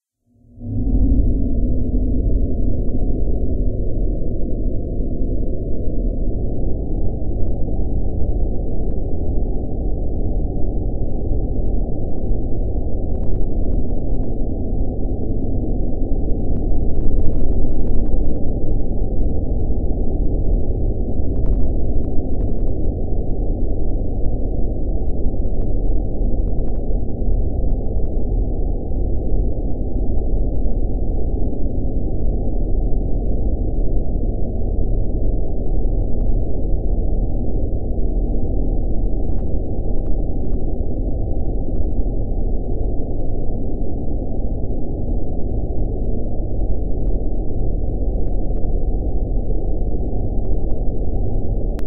low frequency atmosphere made from microphone hum